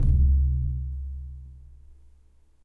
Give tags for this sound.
zoom bass condom kick percussion drum bassdrum h2 rubber

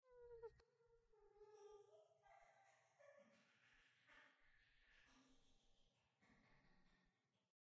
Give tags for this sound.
maniacal evil cackle spirit demented laugh spooky horror insane halloween creepy

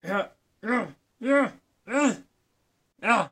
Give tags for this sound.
hungry man male voice

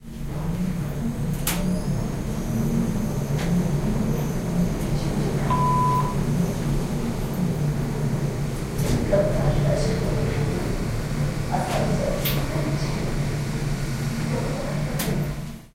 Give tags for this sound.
building elevator lift machine move

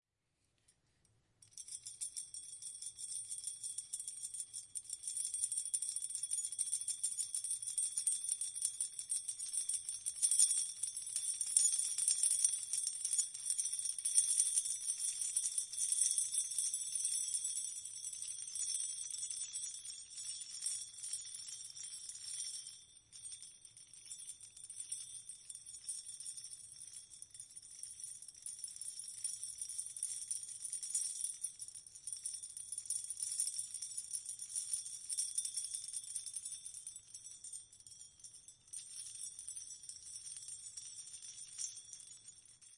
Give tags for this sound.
chink jingle tinkle

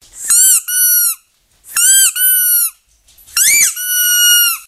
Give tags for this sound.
dog highpitched pet puppy screech squeaking squeaky

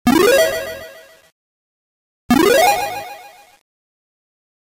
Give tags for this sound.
vgm game 8-bit chiptune chippy sound-design arcade chip video-game retro

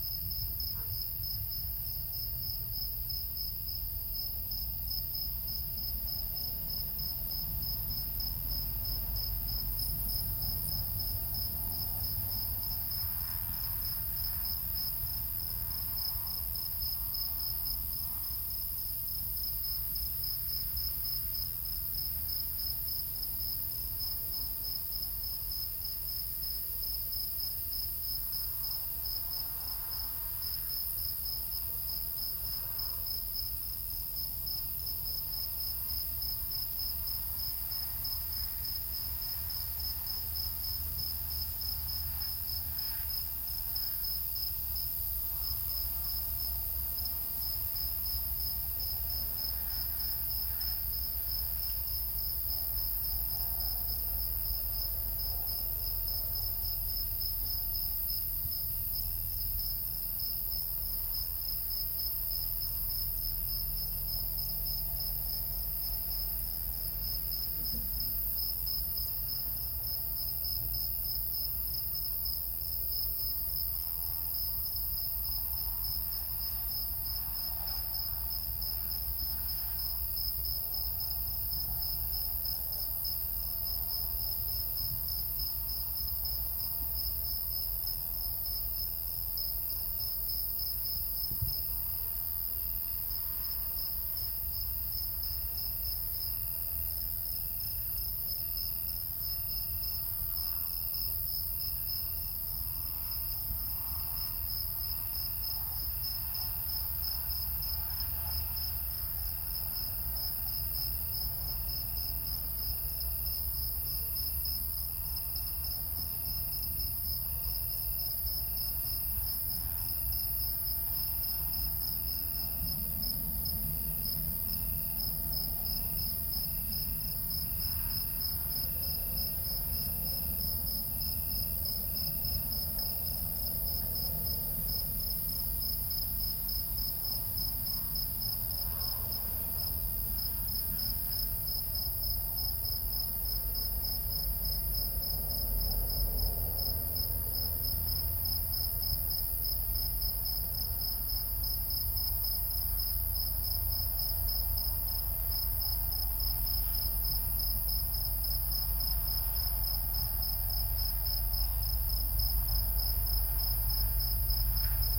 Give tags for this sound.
insects summer field-recording forest night Portugal crickets nature

